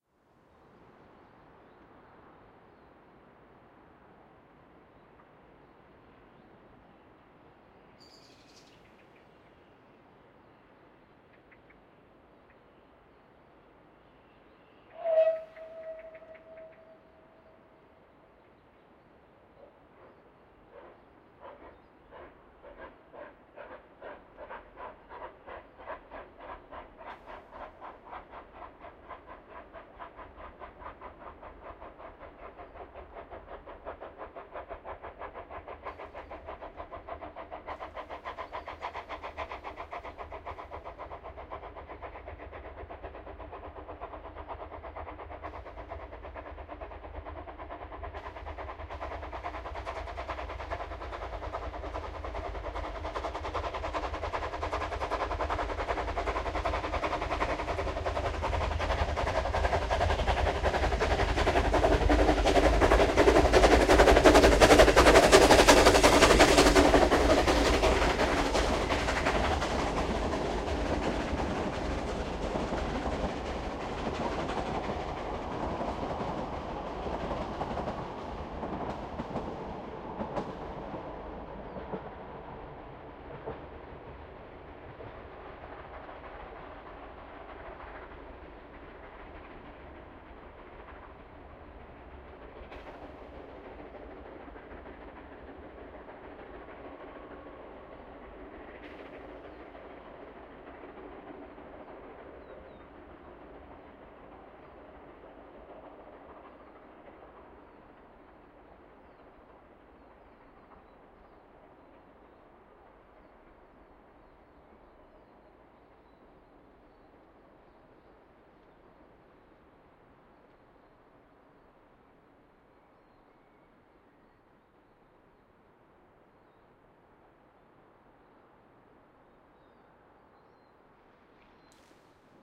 Steam Train 1
A stereo field recording of a narrow gauge Double Fairlie steam train whistling and starting off uphill, it picks up speed as it gets closer. Recorded on a bend on the Ffestiniog Railway with a Zoom H2 on-board rear mics & dead kitten.
engine, field-recording, locomotive, machinery, steam, steam-train, stereo, train, whistle, xy